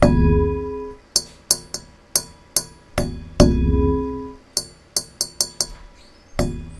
METAL CANDLE HOLDER RHYTHM 2 bars
A Metal Candle Holder that I played a 2 bar rhythm in 4/4 on.